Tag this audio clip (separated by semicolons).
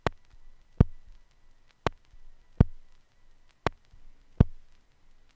record; analog; glitch; loop; noise